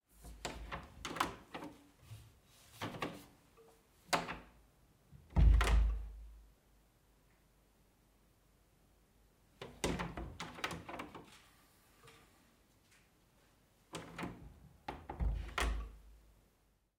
Bath door1
Old door in an old house
Zoom H6 recording
close, door, open, opening, wooden